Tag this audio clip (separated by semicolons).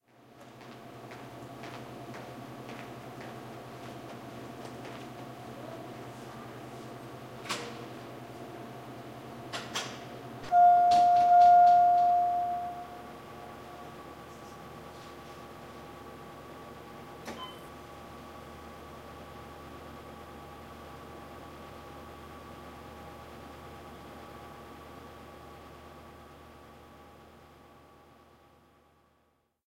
elevator; hospital